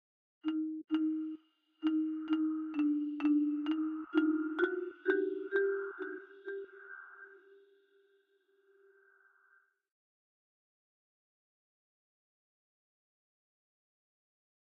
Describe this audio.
A marimba with multiple effects applied